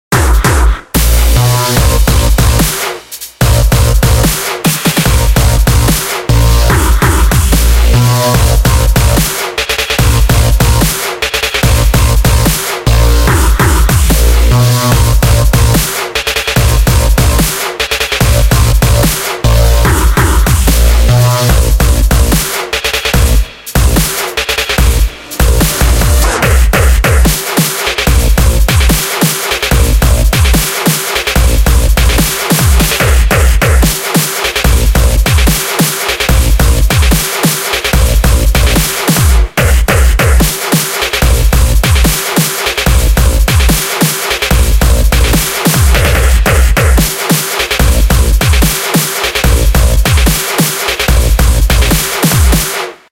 HV Loops (2014) - Fools Gold
!SO HERE THEY ARE!
There is no theme set for genre's, just 1 minute or so for each loop, for you to do what ya like with :)
Thanks for all the emails from people using my loops. It honestly makes me the happiest guy to know people are using my sound for some cool vids. N1! :D
x=X
mastering, synths, snare, limiter, eq, bass, loop, compression, lead, full-loop, fx, electronic, mix, hats, pads, kick